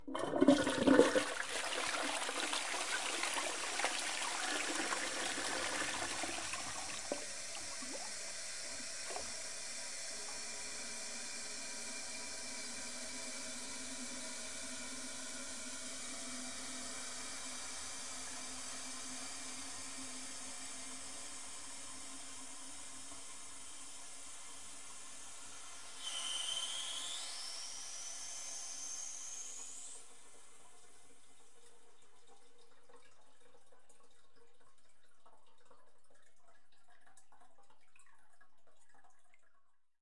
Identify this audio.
Water Bathroom Toilet Flush
Household toilet flush and drain